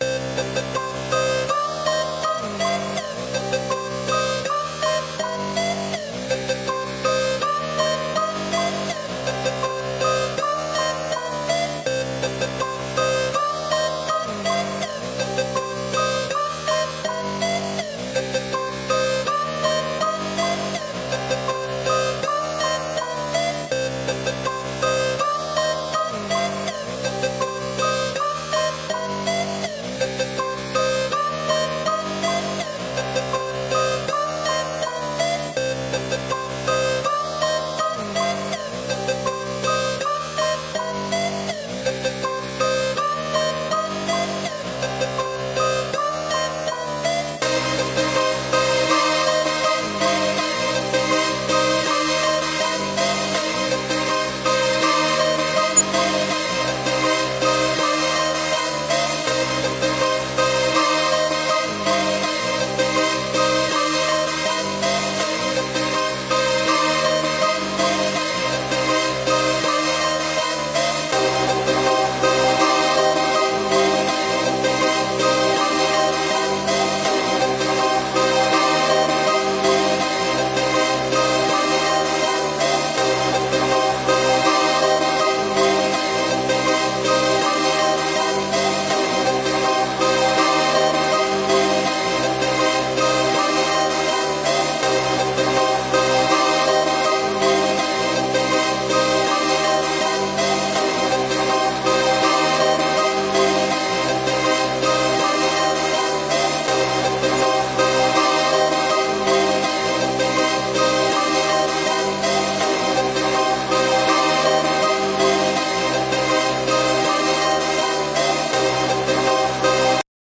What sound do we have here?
Dramatic Music
Sound, Free, Drama, dramatic, music